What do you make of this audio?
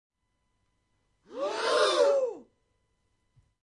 breath group shocked11
a group of people breathing in rapidly, shock-reaction